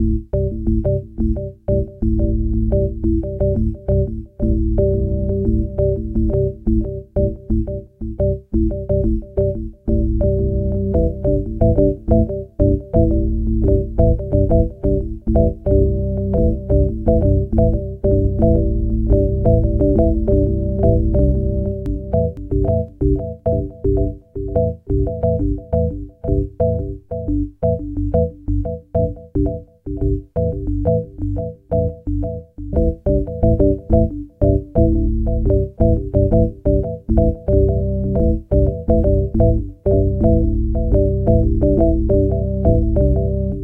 16 Bar Interlock with Delay + Reverb at 88 Bpm
(use PO-12 018)
215, 88bpm, cheap, delay, distortion, drum, drum-loop, drums, engineering, interlock, loop, machine, meniki, Monday, mxr, operator, organ, percussion-loop, po-12, pocket, PSR, reverb, rhythm, teenage, Yamaha